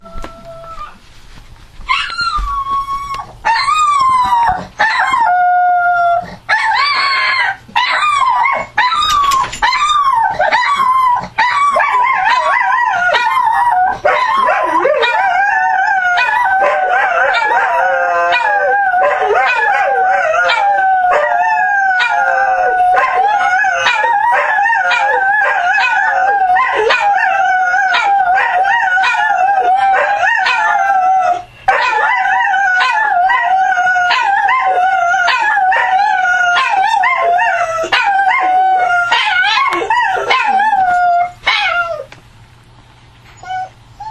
Howl Bark Whine
This is a digital field recording of my four dogs crying and howling. I used an Olympus Digital Voice Recorder VN-6200PC
bark, bulldog, cry, dog, dogs, howl, howling, poodle, whine